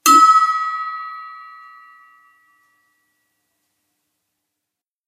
flask ping
A stainless steel flask hit with a fingernail making a nice resonant ping. Oops, the flask can be heard moving very slightly, but that doesn't really matter because the ping is still pretty loud over it. Recorded with a 5th-gen iPod touch.